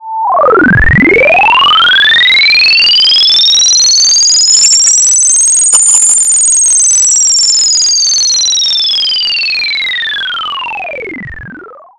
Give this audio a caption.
Electronic pulses rise and fall - Generated with Sound Forge 7 FM Synth